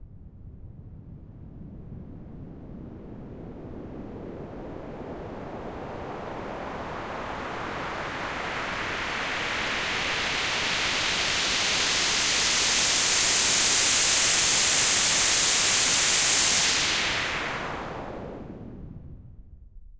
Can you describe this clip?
Sweep (Centre to wide Pan)
White noise sweep, starts at the centre of the stereo field, then pans out wide.
Sweep, Whoosh